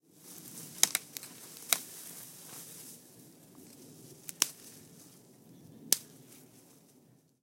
snapping braches pine tree winter 1
Good use for Christmas tree branches being removed
snap, foley, tree, wood-branch, Ext, field-recording, break